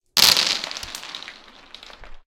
Marbles Rolling
Marbles falling on hardwood floor and rolling.
field-recording, home-made, Marbles